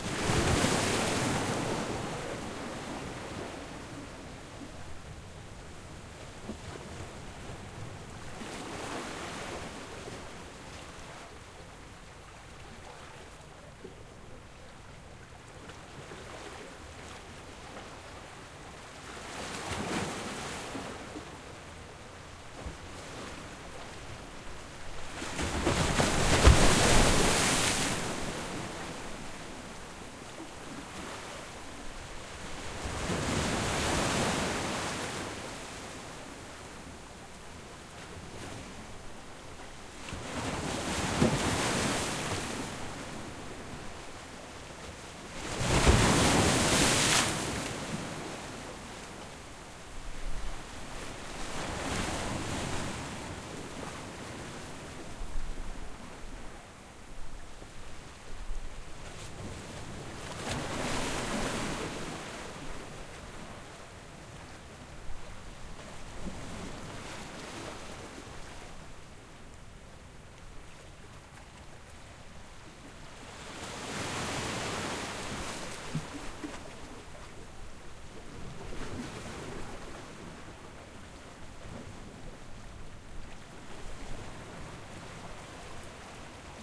Crashing Waves into Rocks 3
Recorded in Destin Florida
Large waves crashing into large rocks. Also, some splash when the water falls down.
beach, coast, coastal, crash, dripping, field-recording, large, ocean, powerful, rocks, sea, seaside, shore, splash, splashes, surf, tide, water, wave, waves